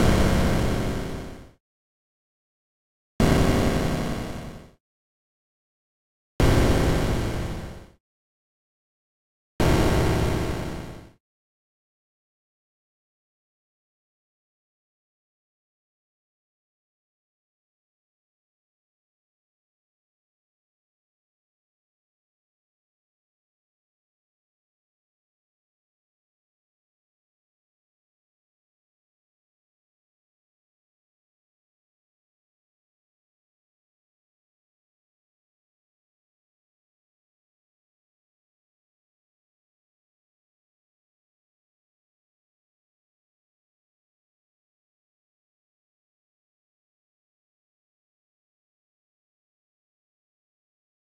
I Couldn't Find A Sound That Sounded Like A Camera Glitch In A Fnaf Game, So I Decided To Make One Myself